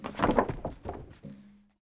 Walking off a pile of wood